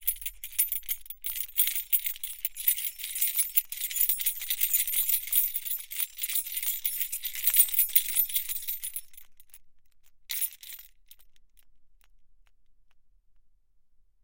keys ringing
keys, metal, anechoic, ring